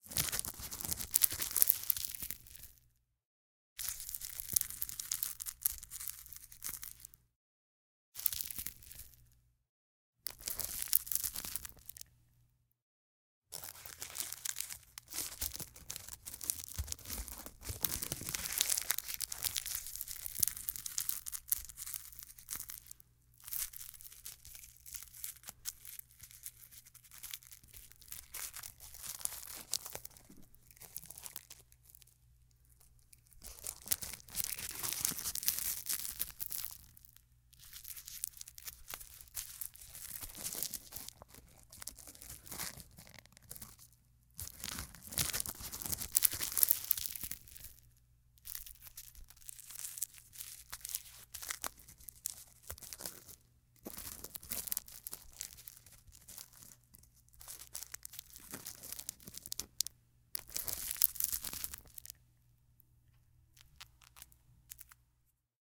rolling paper roll joint
paper, roll, joint, rolling